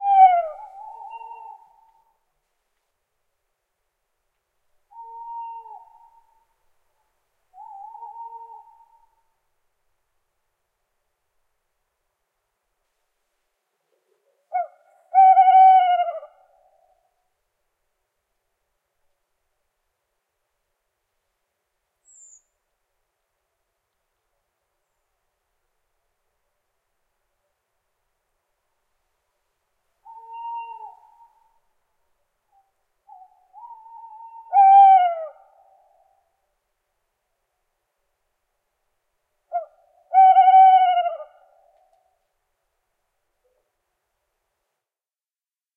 A stereo field-recording of two male Tawny Owls (Strix aluco) hooting; one in the distance and the other much closer, there is another bird that chirps as well. The reverb is natural from the surrounding hills, not processed. Rode NT4 > FEL battery pre-amp > Zoom H2 line in
bird, birds, field-recording, hoot, hoots, owl, owls, stereo, strix-aluco, tawny-owl, xy